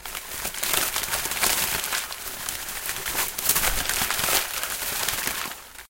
Finding in papers
find, finding, papers